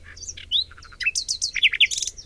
Bird Cherp 42
Field recording from 2019 All natural bird sounds.
Bird, Field-Recording, Foley